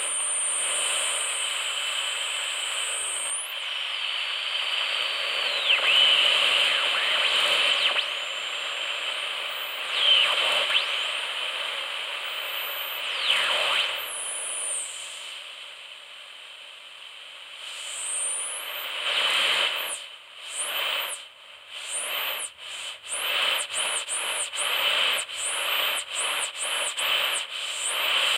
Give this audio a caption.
Lo-fi AM/FM radio (Aerial confusion)
Lofi radio sound recorded with 3 EUR cheap radio unit.
Recorded with TASCAM DR-22WL.
In case you use any of my sounds, I will be happy to be informed, although it is not necessary.